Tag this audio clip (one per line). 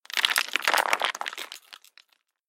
Drop
Falling
Foley
Impact
Rocks
Stones